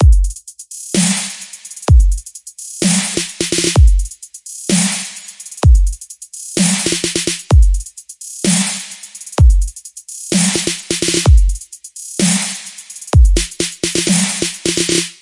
Trap loop drop
I made this traploop for my remix of Truckers Hitch by Ylvis, and i loved it so much i wanted to share it with you :) I used the included samples from FL Studio 11 where i also put togheter the loop and mixing preference.